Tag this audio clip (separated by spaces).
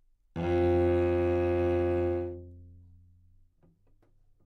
cello F2 good-sounds multisample neumann-U87 single-note